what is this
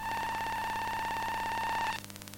Micro beeps 003
Electronic beeping like from a computer.
Recorded from a Mute Synth 2. Better recording that the previous 2, less noise.